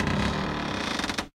Grince Arm Lo-Mid-Lo
a cupboard creaking
cupboard, door, creaking, horror